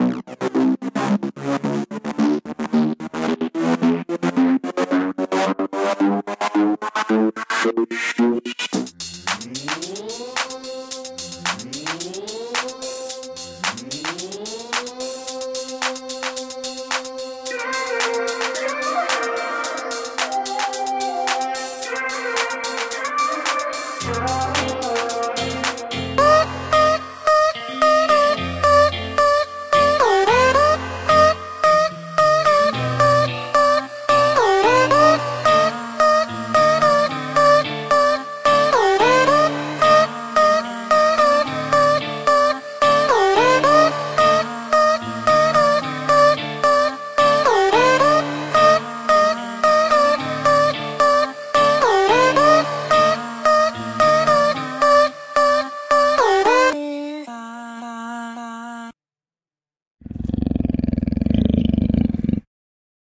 A sound I created in Garage Band I originally intended to use as a podcast intro... I suppose it could also work at the beginning of a pop song or something as well. It includes some synth, guitar, processed vocal sounds, percussion, and a panther growl.